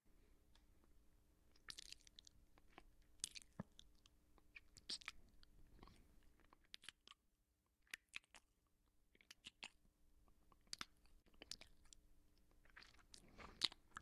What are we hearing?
Mouth Noises
The noises of saliva being moved as a mouth opens and closes.